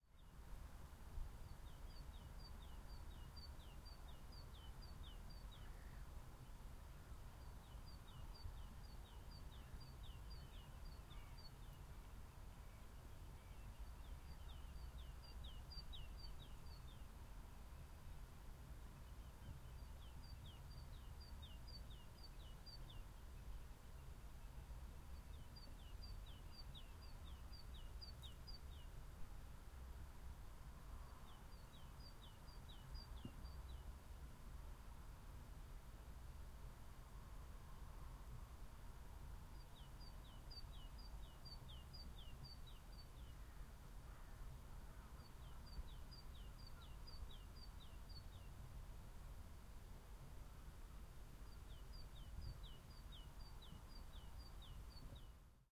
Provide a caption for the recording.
Calm wildtrack recorded by the edge of Hamford Water National Nature Reserve, Essex, UK.
Recorded with a Zoom H6 MSH-6 stereo mic in Winter (January)